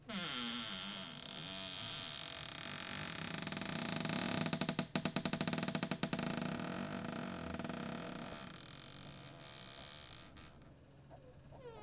cupboard creak 1
A very long, drawn out creak. I opened my cupboard as slowly as possible.